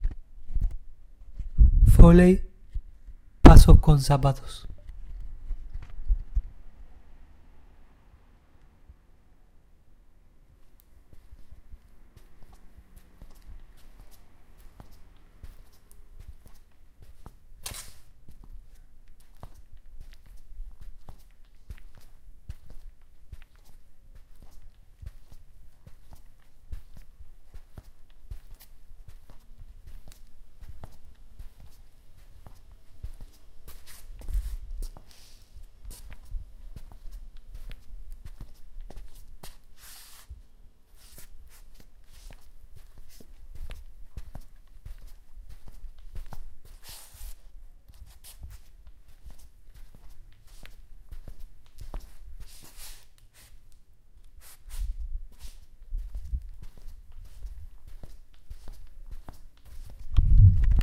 Men shoes - walking
foley, foot, step, walk